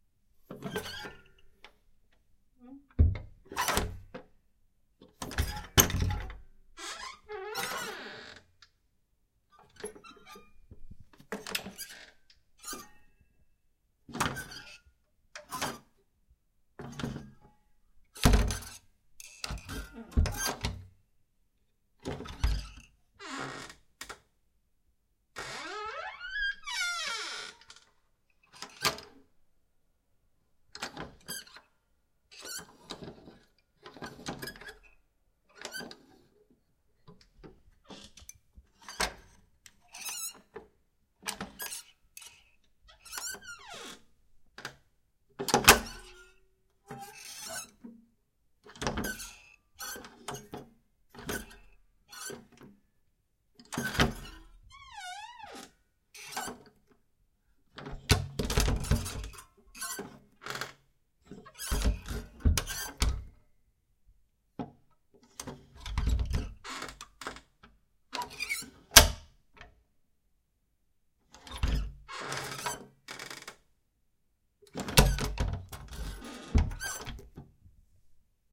door wood interior solid open close with bolt
bolt, close, door, interior, open, solid, wood